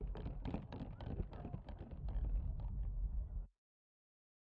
spinning wheel
spin, wheel